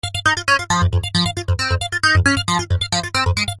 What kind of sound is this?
guitar, bass, loops
bass, guitar, loops